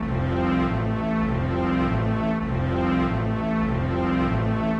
strings loop 10 100bpm CPK
Dark 2 chord progression, Dense strings